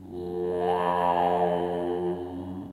random1 - humming
me humming with some magic.
humming, random, voice